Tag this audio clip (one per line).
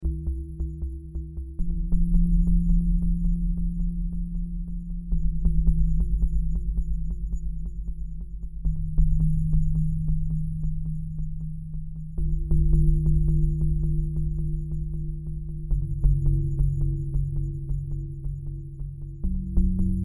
art
performance
sounds